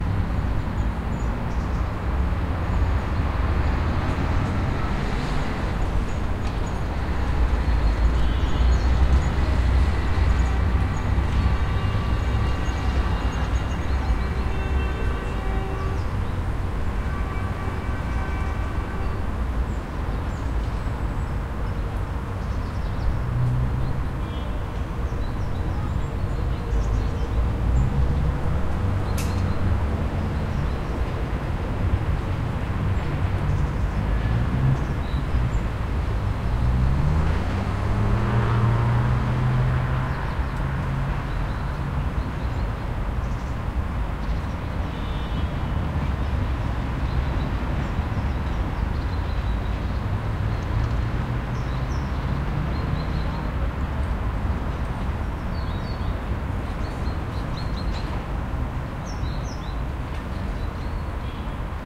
Small city park athmosphere 2
Small city park surrounded by a highway and tramways and one building.
Recorded 2012-09-28 01:15 pm.
hum, saw, suburb, September, city, people, Russia, leaves, rumble, soundscape, park, birds, autumn